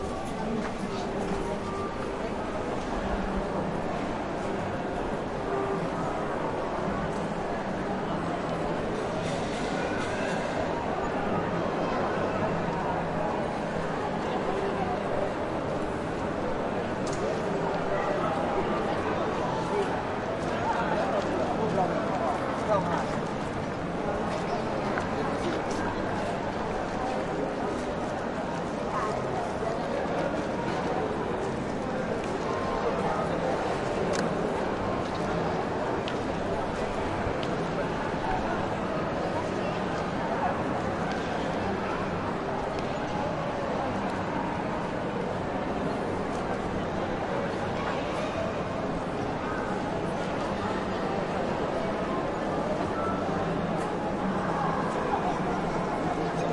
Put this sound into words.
A relaxed walk in the Dubai Mall. Distant chatter with a touch of music. Nice undefined background for human spaces. Not too crowded.
Recorded with Sony PCM-D50.